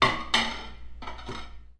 Sounds of forks, knives and plates clashing
knife, dish, dishes, eating, fork, plate, clank, dinner, porcelain